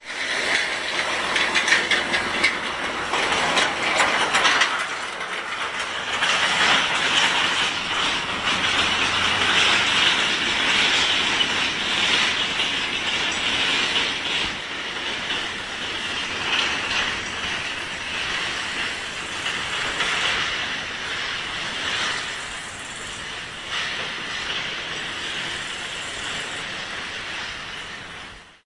mini truck on cobbled road210810
21.08.2010: about 11.40. the sound made by passing by mini truck on cobbled road/street (Magazynowa street in the center of Poznan). in the background the crickets sound.